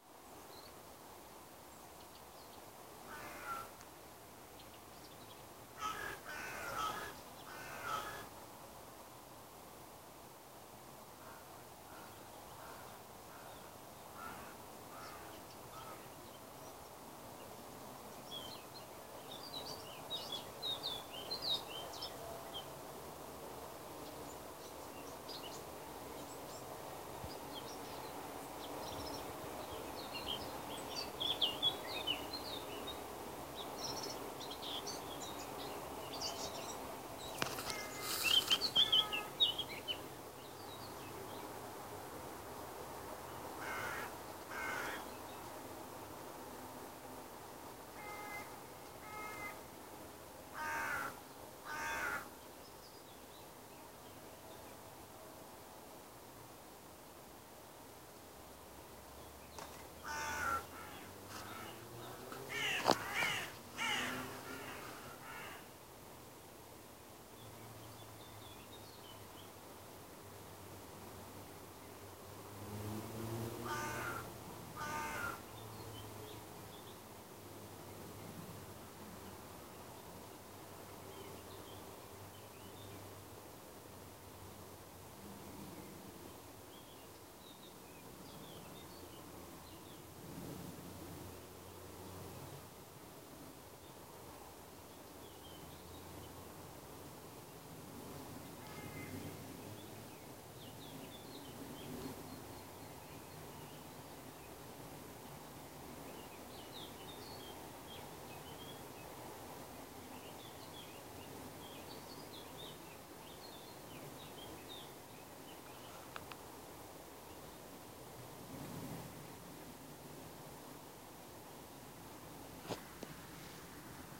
Riverside ambiance 2
nature, water, flow, ambience, field-recording, creek, river, birds